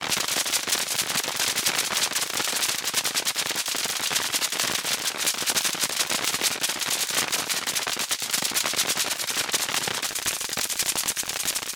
Mechanical, transformation, Clicks, mechanism, press, gear, long effect.